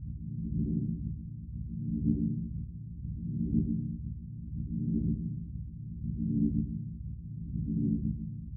Hum Machinery Power Synthetic Machine Sci-Fi
Loopable sound generated and modified in Adobe Audition. I wanted it to sound like a space ship hum or exotic power station.
Machinery, Power